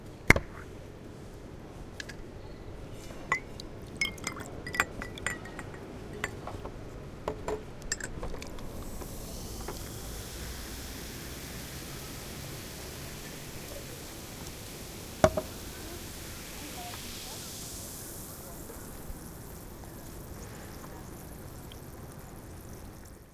Opening a fanta can and pouring it.
bubbles, coca, coke, bar, fanta, drink, ice